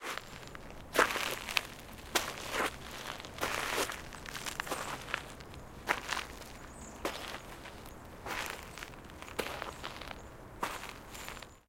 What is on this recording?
Person slowly walking on gravel path in a city. Recording made on a cemetery in Utrecht, Netherlands.